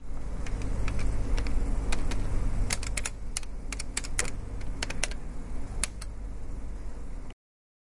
Printer Buttons
Sounds of touching configuration buttons of a printer in 'Tallers' area.
printer, printing, message, button, UPF-CS14, electrical, photocopier